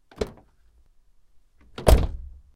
Cardoor, open-close 2
A car door that opens and closes.
door, cardoor, close, open